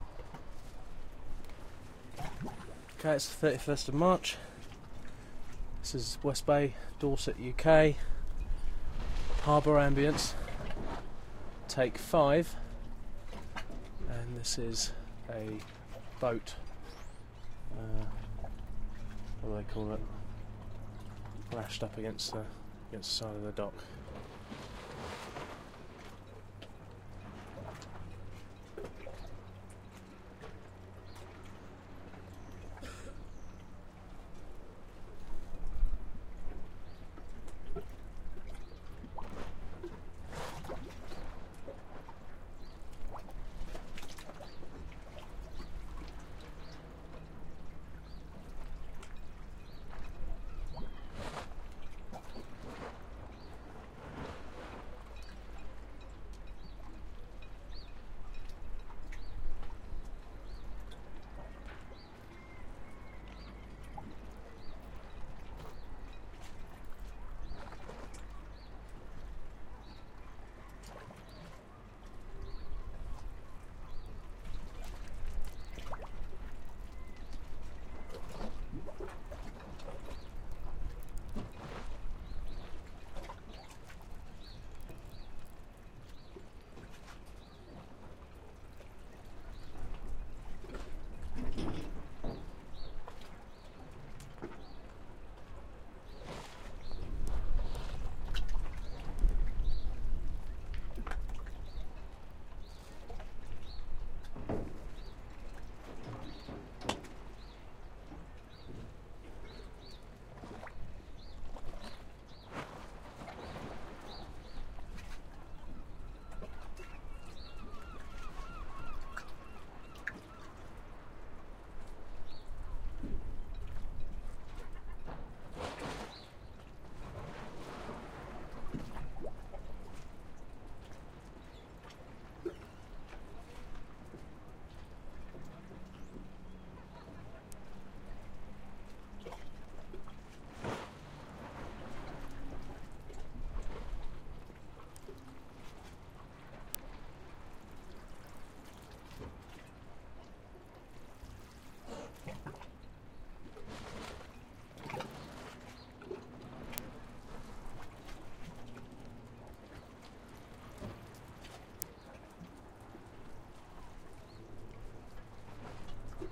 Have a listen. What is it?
Small Harbour Ambience